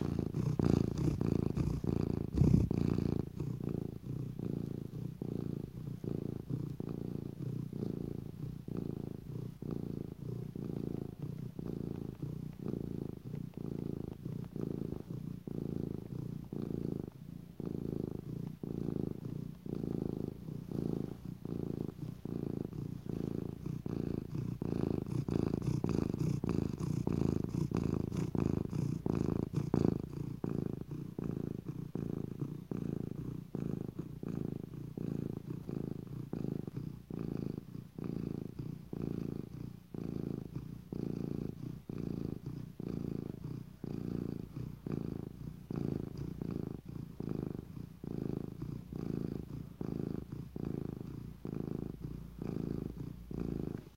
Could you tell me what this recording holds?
Cat Purr
My cat Peaseblossom purring at various speeds and intensities.
cat, purr, pets, sounds, cats, domestic, animal, purring